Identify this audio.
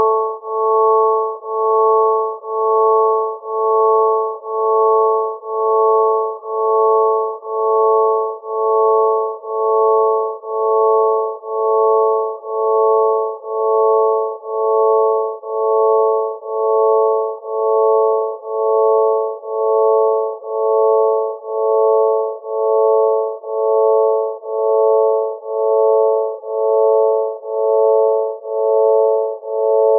Long multisamples of a sine wave synthesized organ with some rich overtones, great singly or in chords for rich digital organ sounds.
drone, multisample, organ, pad, synth